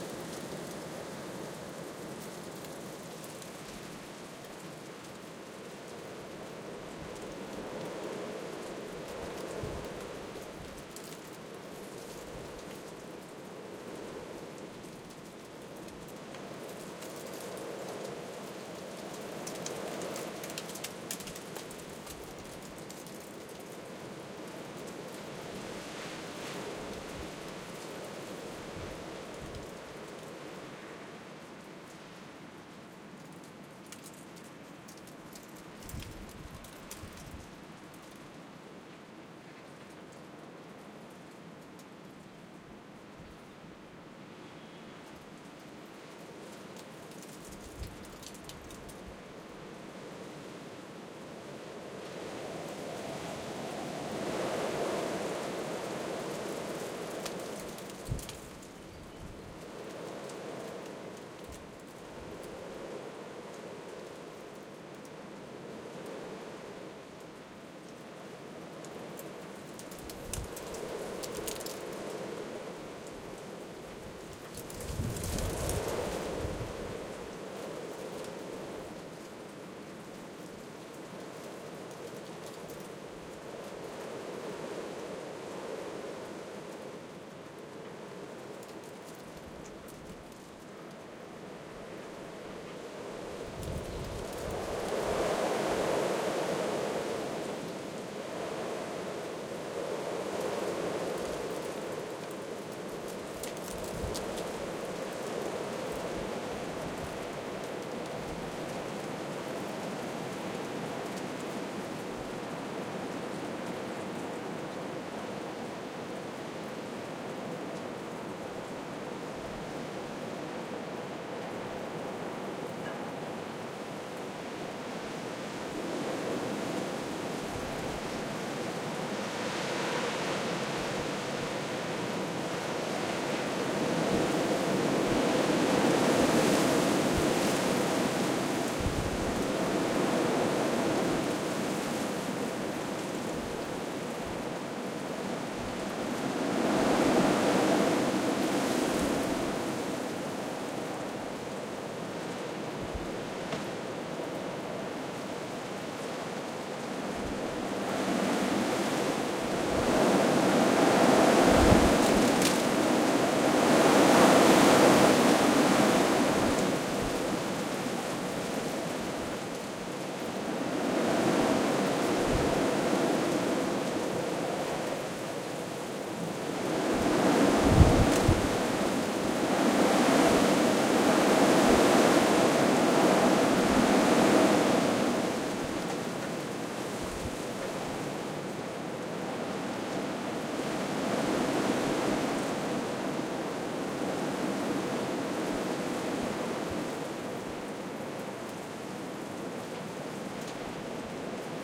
Winds of storm Eunice recorded in Essex, UK at around 11:00 on 18/02/2022. There is some wind noise across the microphones.
Recorded with a matched pair of sE Electronics SE8 Pencil Condenser Mics attached to a Zoom F6.
eunice, storm, wind